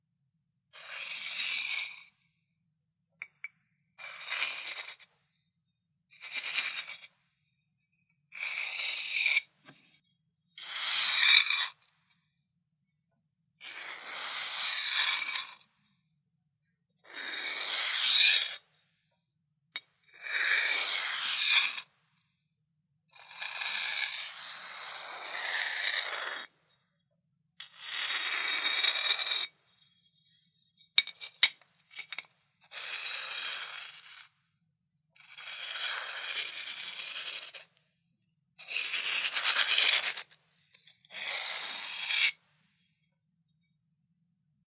Scraping tiles
Various sounds of two ceramic tiles being dragged on top of each other.
ceramic, scraping, tile, tiles